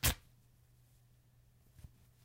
A pack of Rips and Tears recorded with a Beyer MCE 86N(C)S.
I have used these for ripping flesh sounds.
Enjoy!